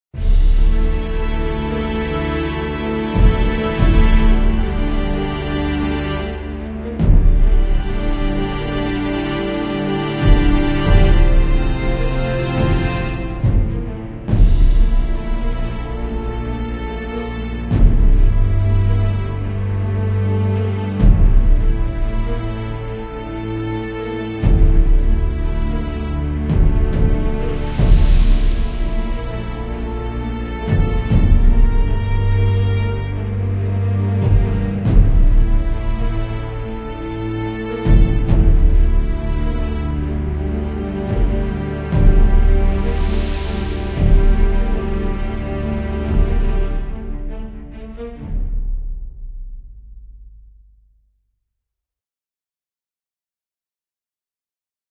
Day of defeat
violin, movie, sad, orchestra, interlude, film, outro, orchestral, viola, bassoon, cello, neo-classical, filmmusic, slow, strings, finale, classical, cinematic, melancholic